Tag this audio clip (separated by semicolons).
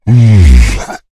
arcade; brute; deep; fantasy; game; gamedev; gamedeveloping; games; gaming; indiedev; indiegamedev; low-pitch; male; monster; Orc; RPG; sfx; Speak; Talk; troll; videogame; videogames; vocal; voice; Voices